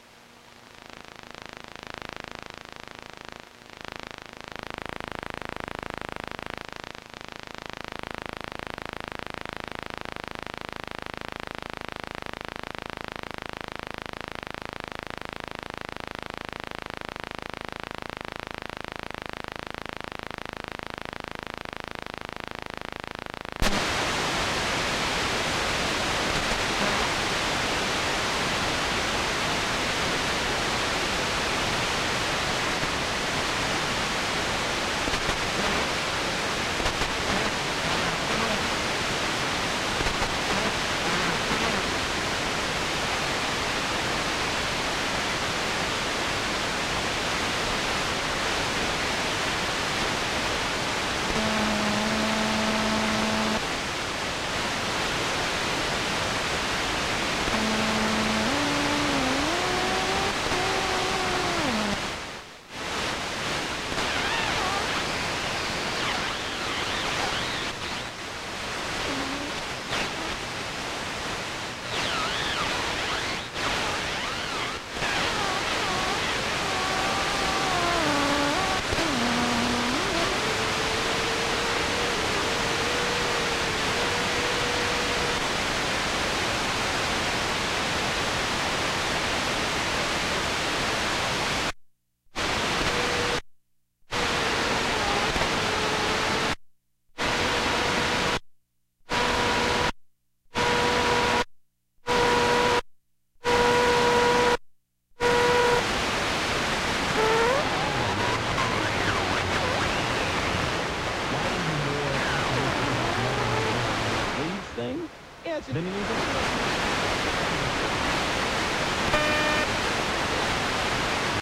Electro-magnetic interference from the Colorino Talking Color Identifier and Light Probe when held near the internal Ferrite antenna on the back right of a 13-year-old boombox near the bottom of the AM broadcast band, from 530 to 580 khz. You first hear the device inactive being brought near the radio. This gives a low buzz of stacato clicks. At about 00:23 the light probe button is briefly pushed, you hear a quick boop of the light probe with low light level combined with the beginning of the white noise of the device active. If you put your ear near it after you use it, you will hear a slight hiss from the audio amplifier carrier idling for about a minute after last use. On the AM radio this translates to white noise. At 00:26 there is a double click and a distorted voice says black. The voice is being picked up by the AM radio. 10 seconds of white noise and I press the color button again and it says black. I put something else over the color sensor and it says a few more things.